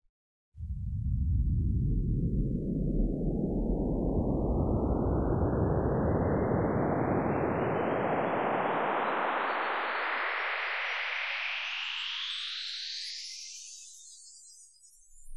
I took an image that represents me, and ran it through the VirtualANS. It sounds like some kind of loading sound that becomes sparkly-sounding and retro.